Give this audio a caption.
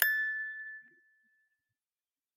clean la 1
eliasheunincks musicbox-samplepack, i just cleaned it. sounds less organic now.
note, musicbox, sample, toy, metal, clean